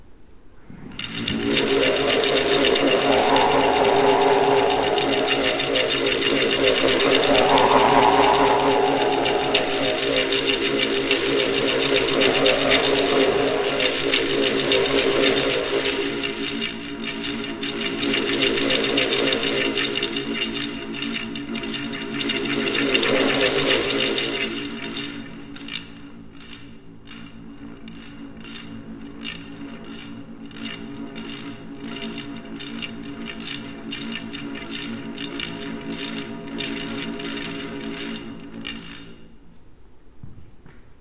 A "Wind Wand" from folk instrument company Lark in the Morning (a kind of bullroarer consisting of a kind of mast supporting several large rubber bands which is swung around the head).
air, band, blades, bullroarer, fan, propeller, rotate, rotor, rubber, turbine